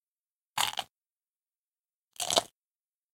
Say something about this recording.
crunch munch
High quality munching sound created by biting into a waffle cone.
eat, bite, crunch, munch, chomp